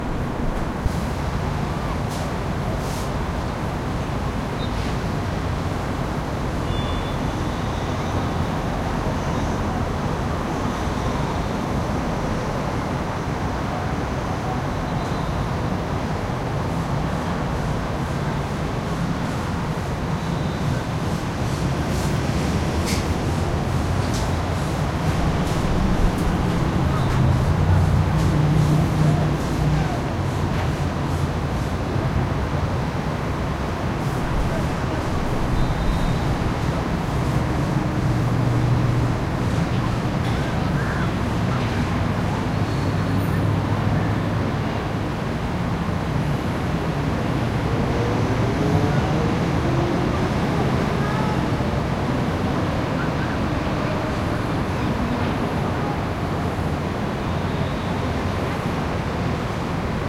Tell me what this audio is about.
180623 AMBIÊNCIA URBANA 01 60s
Urban ambience normalized to -3dB.